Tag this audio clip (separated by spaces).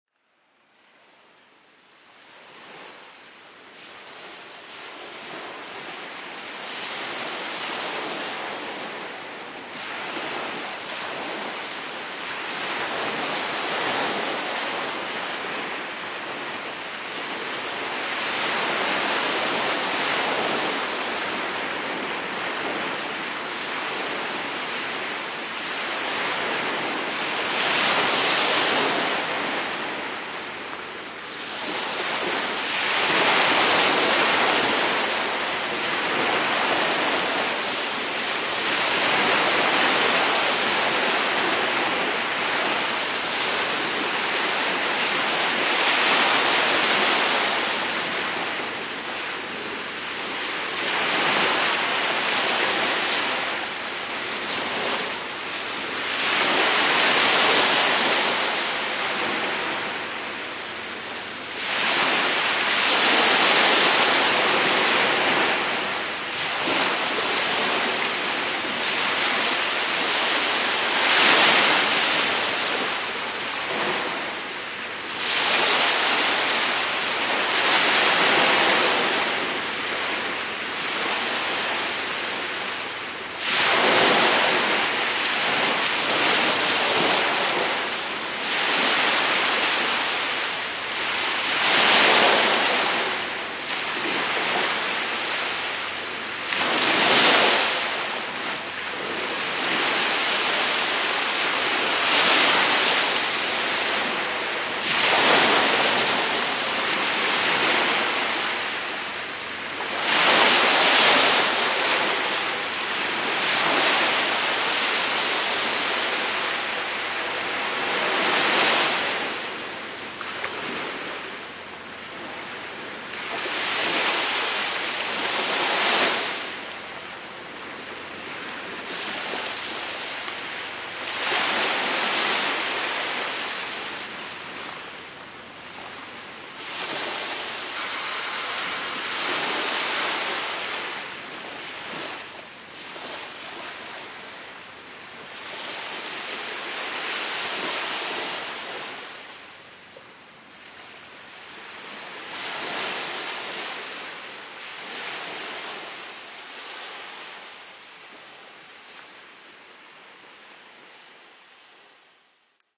coast sea morning mediteranean waves